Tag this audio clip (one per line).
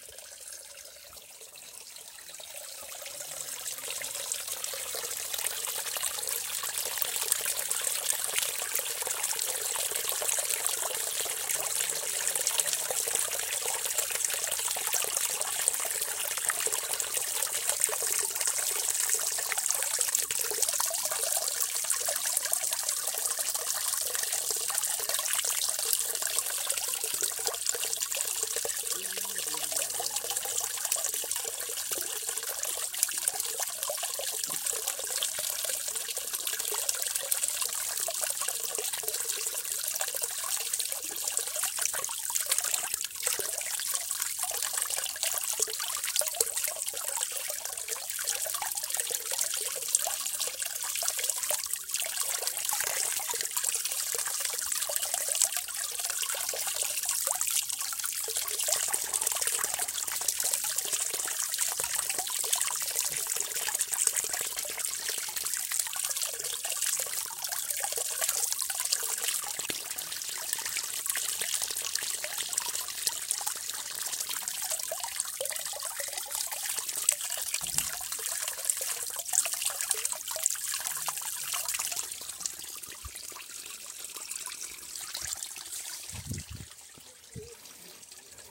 stream
reverberation
trickle
relaxing
water
liquid
creek